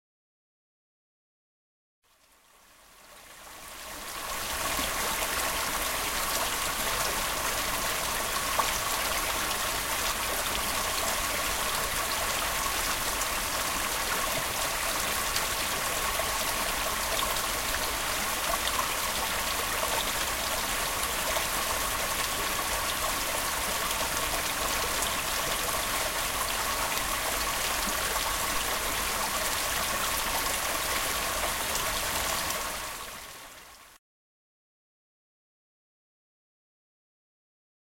2-5 Stream close light
CZ, Czech, Panska, stream, water